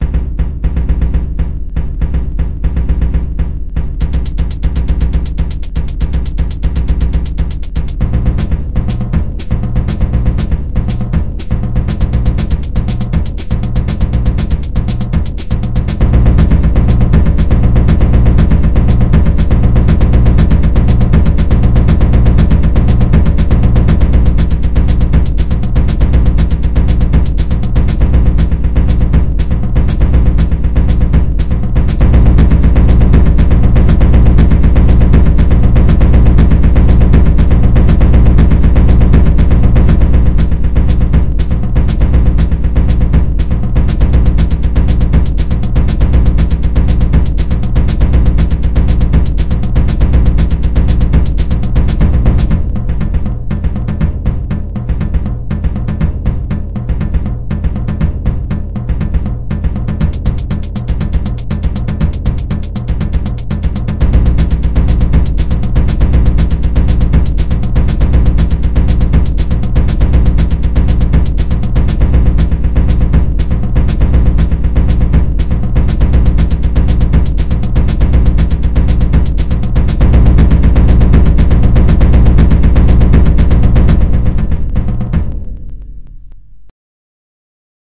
Ogre Chase
Chase drums made 100% in LMMS Studio. Instruments: Hithat, tons, hihat, and bassdrum acoustic. Action music for chase scenes and more.
CHASE, DRUMS, WILD, FAST, CINEMATIC, ACTION, CREATURE, SURVIVAL